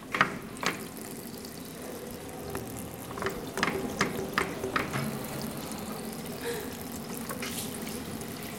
SonicSnap JPPT5 Water
Sounds recorded at Colégio João Paulo II school, Braga, Portugal.
water, Joao-Paulo-II, Portugal